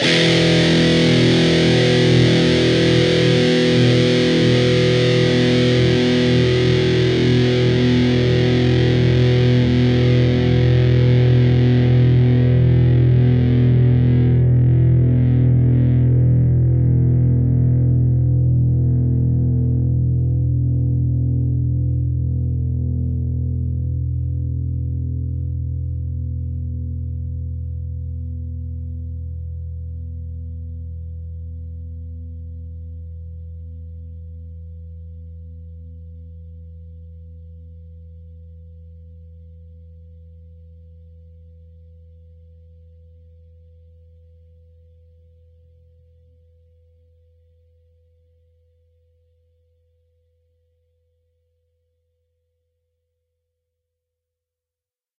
E (4th) string open, A (5th) string 2nd fret. Down strum.